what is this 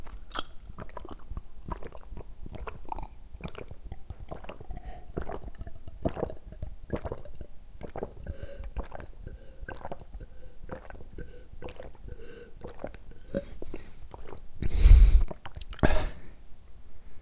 Me drinking a glass of water
glass-of-water, drink, liquid, drinking, water